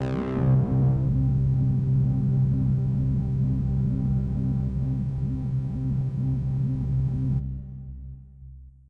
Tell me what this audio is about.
Space Pad
Created by layering strings, effects or samples. Attempted to use only C notes when layering. Strings with layered synth, bass, and a kind of sci-fi pulse.
Bass, Effect, Layered, Sci-Fi, Strings, Synth